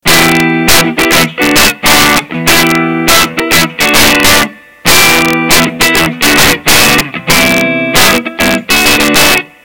Primitive Pedal
Example of distortion pedal in clean audio
guitar, Distortion, Pedal